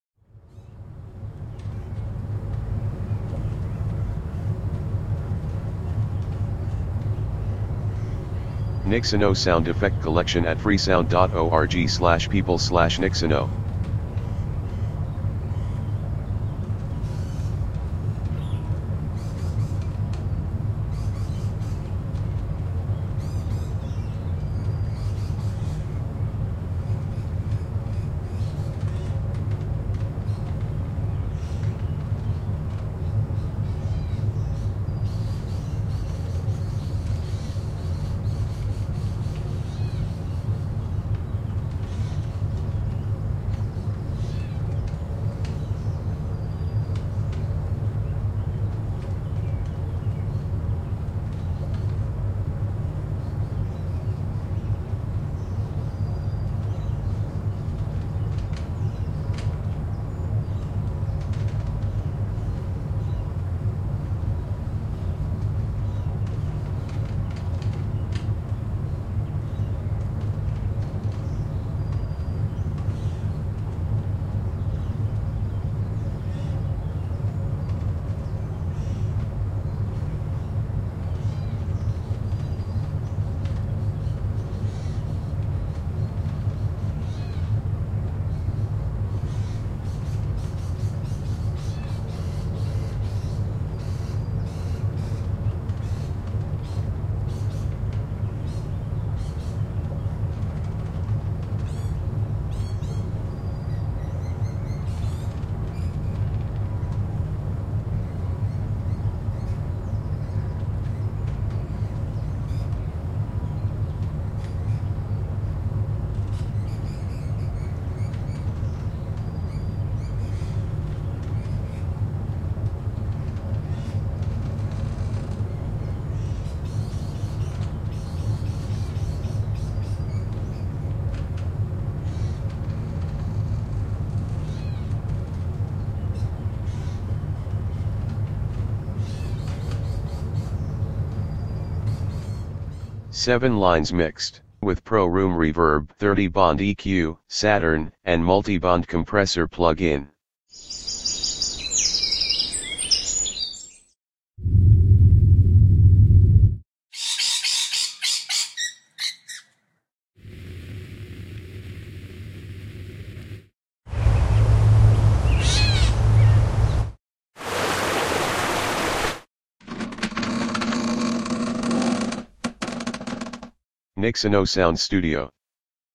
Room Ambience in Farm or forest like jungle house
7 line mixed with 30 Bond EQ + Multiband Compressor + Saturn and Pro R Reverb
3 line rec :
Record: blue spark microphone + steinberg UR22 and Zoom H5